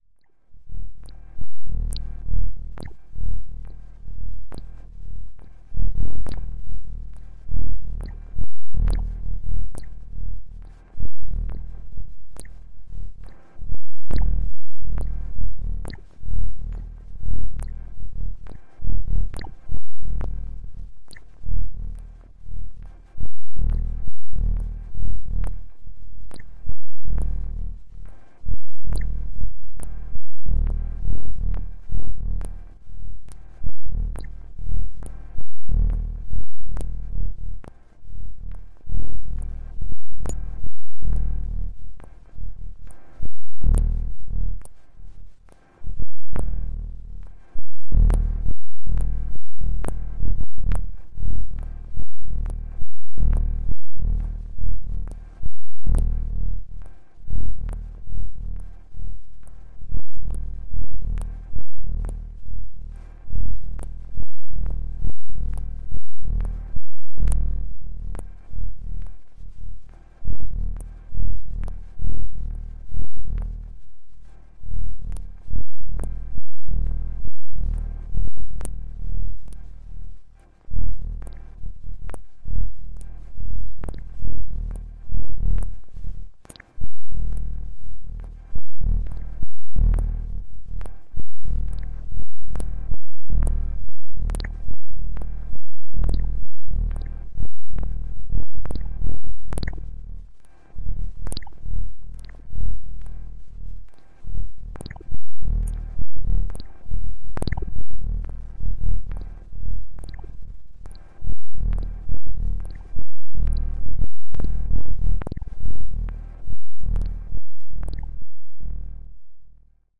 1.This sample is part of the "Noise Garden" sample pack. 2 minutes of pure ambient droning noisescape. Droplets with some added strange noises.